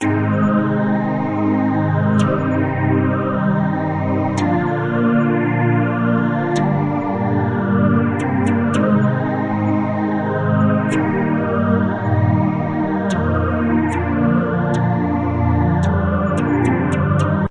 Zipper Pad Synth Line
An unused synth line I made years ago. It's very electronic sounding, and the chords are a little sad sounding. 110-bpm.
Chords-A♭, Cm, B♭, Gm, A♭, E♭, B♭add11
110-bpm, Chords, Easy, EDM, Electronic, Electronica, Emotional, Futuristic, Line, Loop, Melancholy, Sad, Sci-fi, Slow, Space, Synth